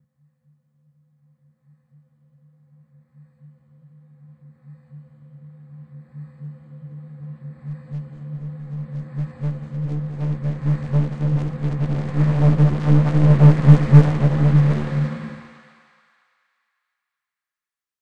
Horror Tension Reverse
Cinematic horror sound
Cinematic, haunted, horror, scary, sinister, sound, thrill